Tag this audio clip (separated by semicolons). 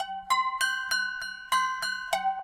148; bpm; dissonant